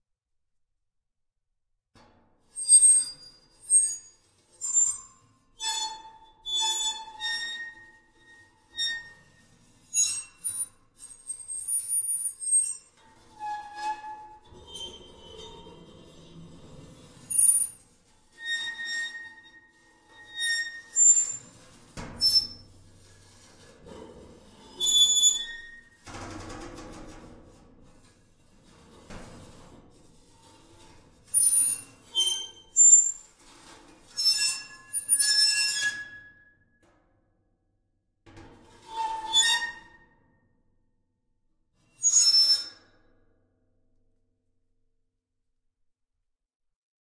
scratching metal 03
An empty, resonant metal box, treated by various objects.
industry, dungeon